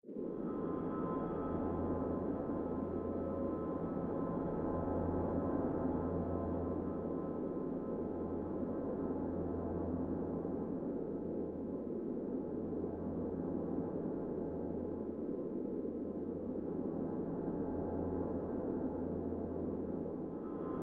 Multi-layered ambience recording done with Yamaha keyboard using the metallic and sweep pads along side the slider bar to give it that fading effect.
I modified the FX atmoshper with the seashore effect to give it that low base in the background.
wind, Pad6, spooky, Pad8, dark, FX4, sweep, metallic, atmosphere